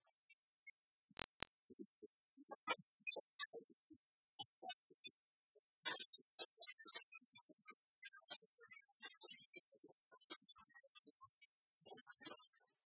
stereo recording of flushing toilet, in my house